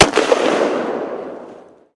Single soldier training rifle shot.